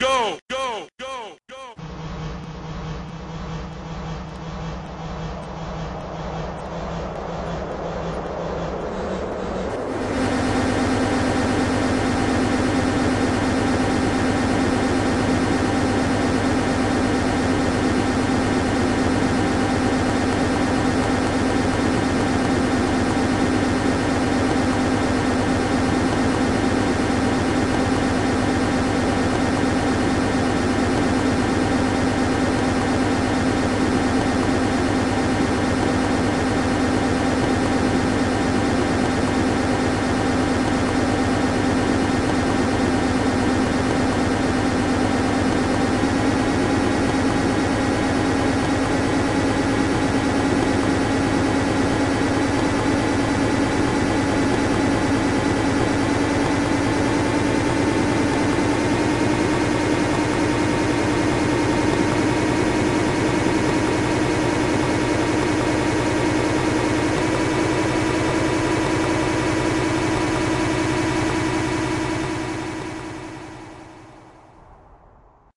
take off sample
a nice sample of a helicopter starting up,taking off, and slowly fading away.
if you want individual parts of the piece
comments are welcomed :)
airport, chopper, departing, go, helicopter, leaving, nano, off, take